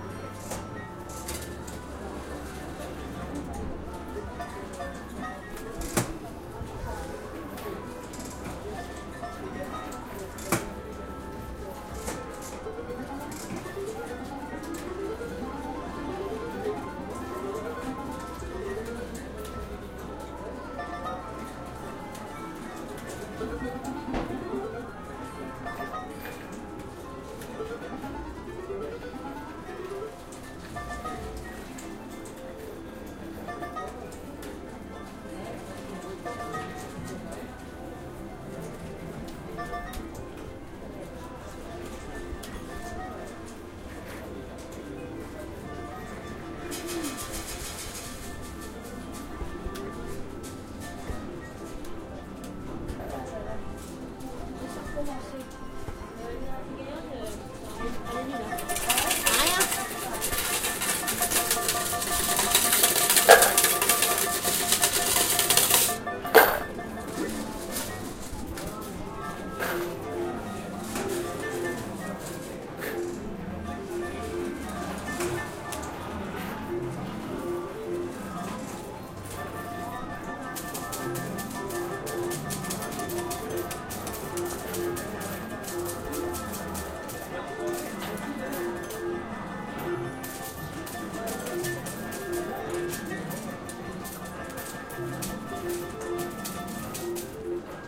Bruit-2-Casino
À l'intérieur du Casino de Granville
Inside the Granvile's casino
casino, machine-a-sous, slot-machine